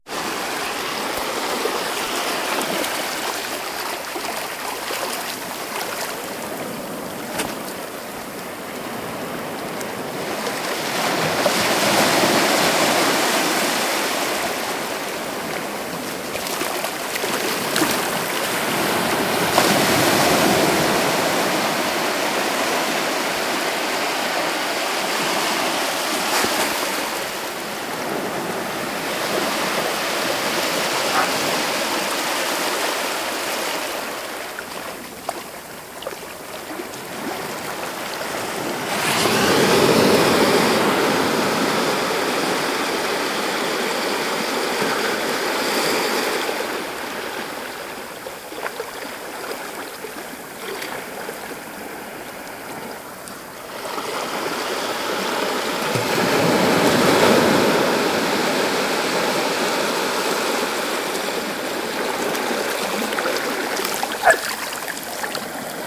Relaxing Beach Waves
Recording of soft waves lapping on beach shore